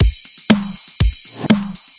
Dirty Moombah Core Drum Loop
Loop I made while producing a track, layered a bunch of drums, carved out the bottom end and synthesized my own, and then compressed it all together, and excited a bit.
beat, 120-bpm, percussive, kick, loop, snare, drums, groovy, hi-hats, drum, hard, drum-loop, quantized, hats, excited, hi-hat, hard-hitting, saturated, punchy